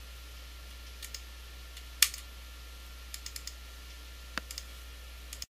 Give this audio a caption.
mouse clicks
this is a mouse recording, for like: lego movies, animation, and other stuff
clicking, mouse, sounds